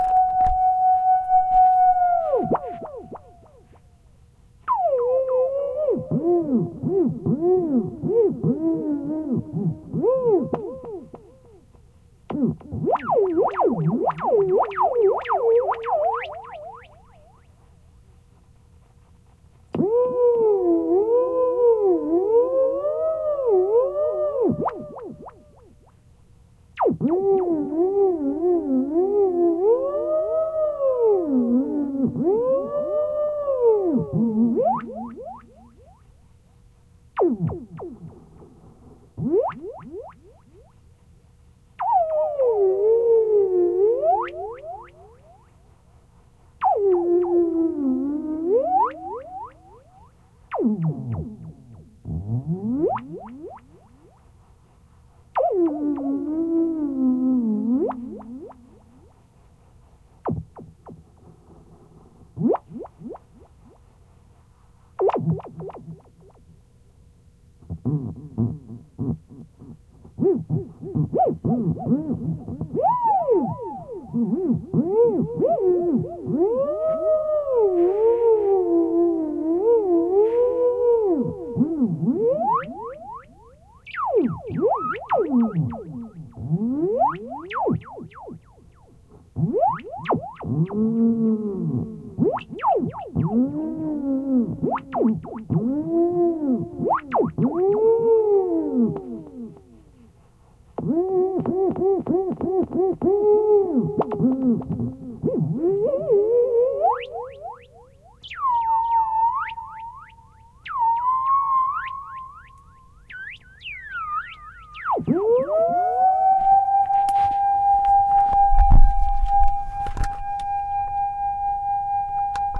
Sounds made with a theremin